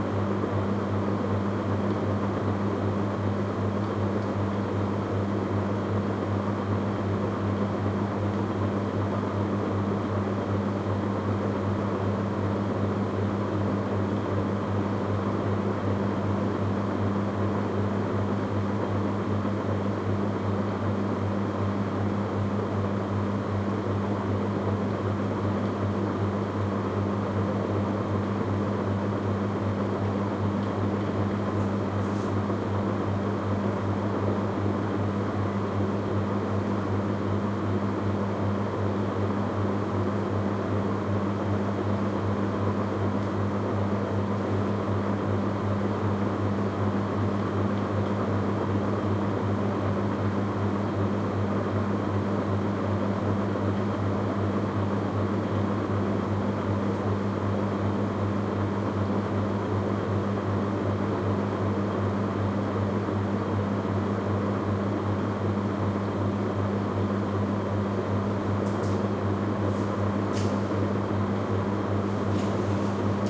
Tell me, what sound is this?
20180831.ceiling.fan.constant
Noise of a Westinghouse ceiling fan at constant speed. Sennheiser MKH60 + MKH30 into SD Mixpre-3. Decoded to mid-side stereo with free Voxengo plugin.
air, fan, field-recording, heat, motor, summer, wind